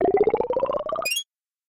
Potion Drink Regen
Regen sound for drinking a potion (no glugging)